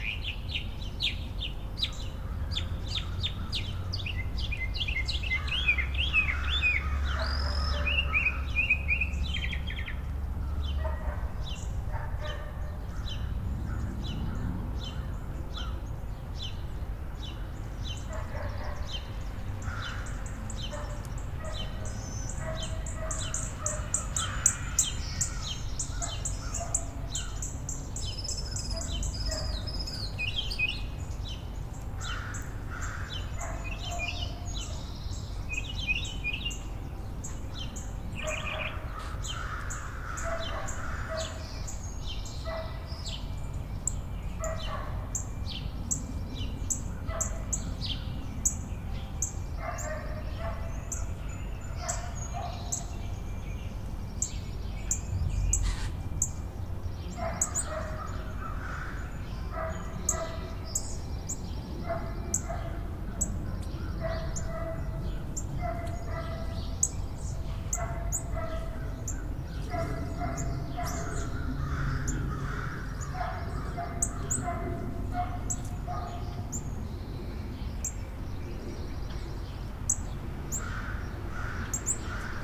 outdoors mono suburb
ambience, bark, birds, dog, outdoor